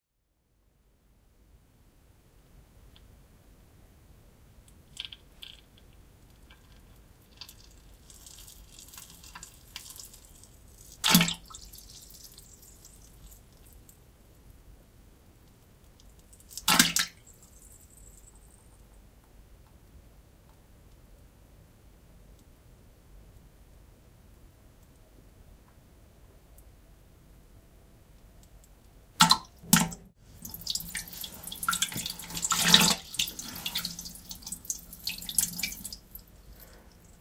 Pooping and Pissing
Going to the toilet.
and in the included documentation (e.g. video text description with clickable links, website of video games, etc.).
annoying, disgusting, eww, excrement, excrementing, filth, filthy, piss, poop, pooping, shit, shits, shitting, stink, stinking, toilet, urine